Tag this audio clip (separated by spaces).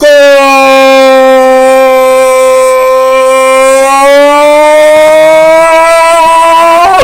human voice scream